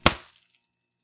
bag pop
popping an air filled bag from packages
bag, pop, boom